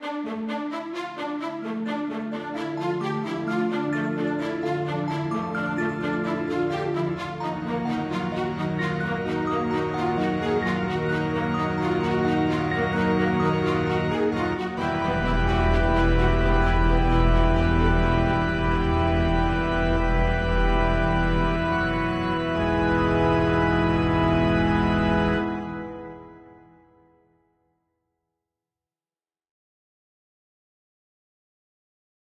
Epic song
epic, organ, string, synthesizer